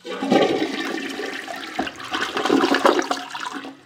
Sound of toilet flushing. Recorded on a Marantz PMD661 with a shotgun mic.
bathroom, flush, restroom, toilet, water